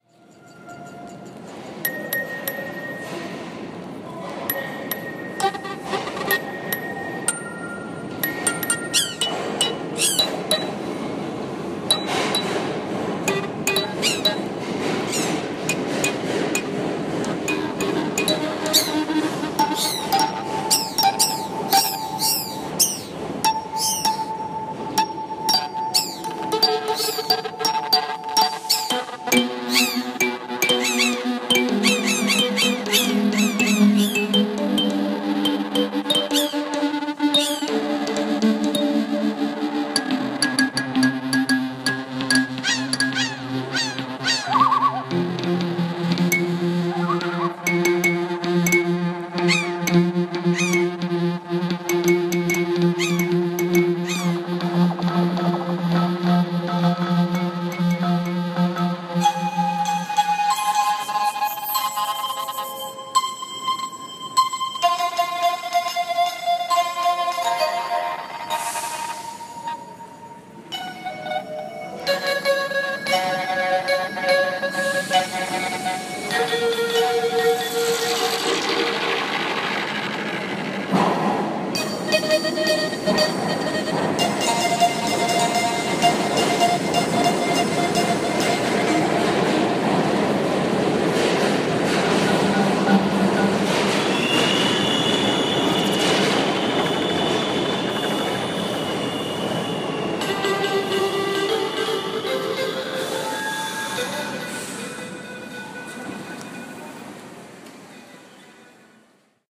34th St Sound Installation Subway Take 2
Sounds of the art installation in 34th Street subway station as trains go past.
34th, ambience, ambient, art, atmosphere, city, field-recording, installation, new, noise, nyc, soundscape, street, subway, trains, york